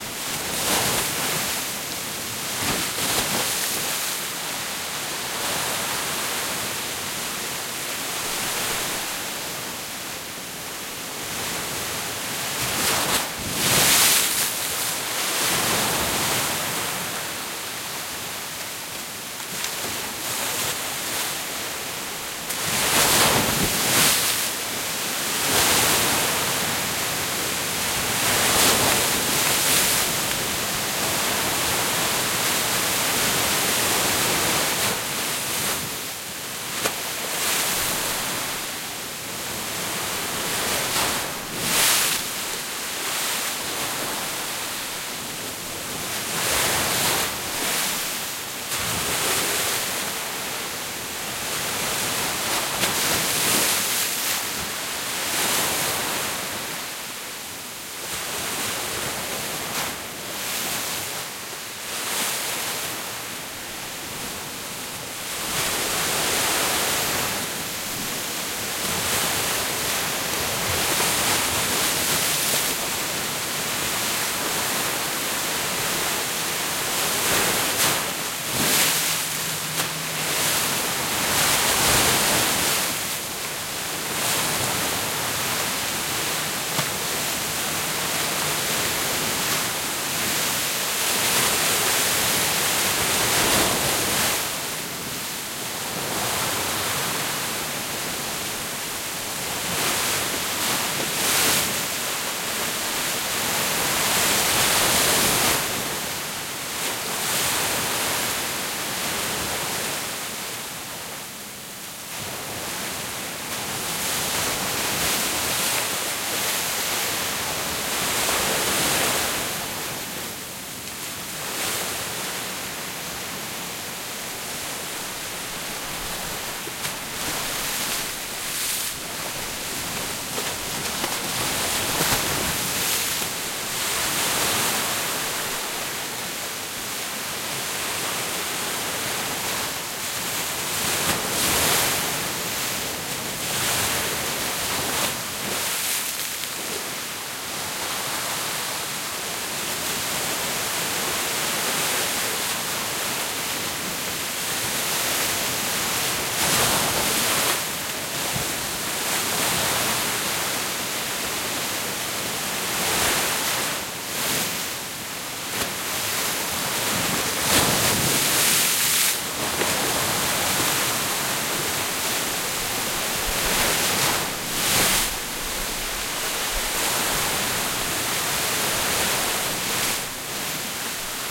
Waves crashing against a seawall at the Jupiter Reef club in Jupiter Florida after a storm. Recorded with XY mics on a ZOOM H6
Zoom-H6, beach, beach-surf, beach-waves, crashing-surf, crashing-waves, seawall, splashing-waves, storm, surf, waves